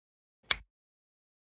finger-snap-mono-01
10.24.16: A natural-sounding stereo composition a snap with one hand. Part of my 'snaps' pack.